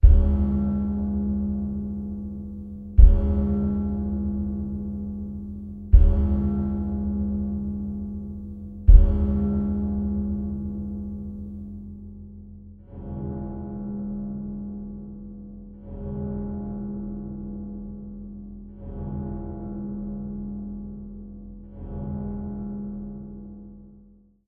Dark Bells
sound-design, bells